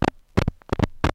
Various clicks and pops recorded from a single LP record. I carved into the surface of the record with my keys, and then recorded the needle hitting the scratches.